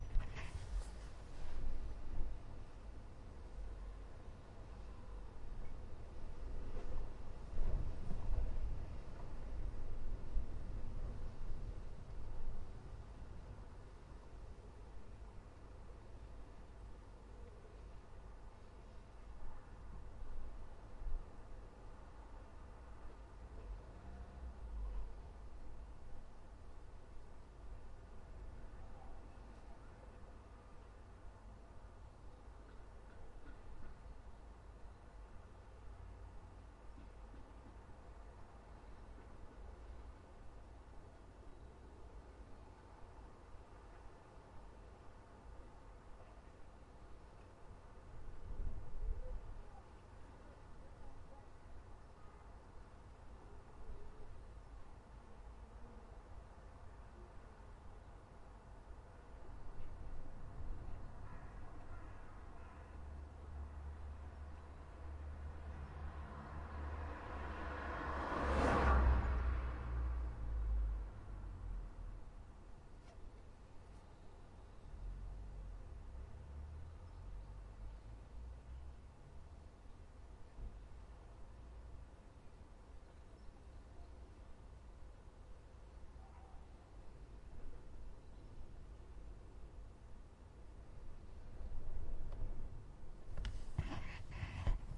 Urban Roadside 1
Urban atmosphere from inside parked car with windows open. Car drives by.
field-recording, urban, car-by, ambience